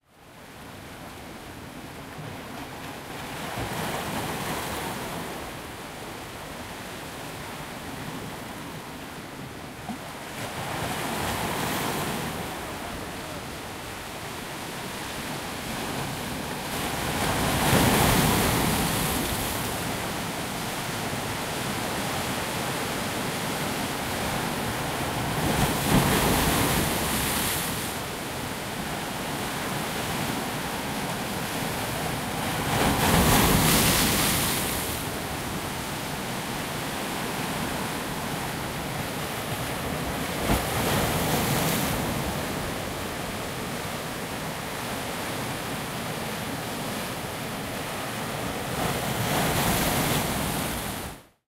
Taken with Zoom H2N, the beaches of Cyprus
beach, coast, ocean, sea, seaside, shore, water, wave, waves